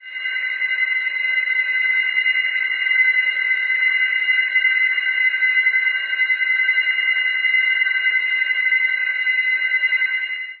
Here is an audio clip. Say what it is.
drone phone
it sounds like a processed phone ringing but it isn't.
sci-fi, drone, fx